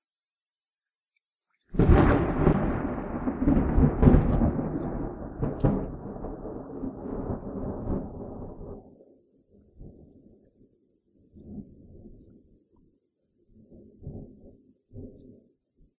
Thunder - Medium Distance (no rain)
I recorded some thunder at a medium distance with my Tascam DR-05.
rumble, strike, thunder, thunderclap